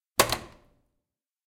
Here is the sound of a phone hanging up